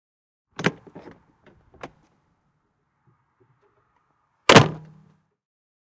Horsewagon door o:c
Horsewagon from 18th century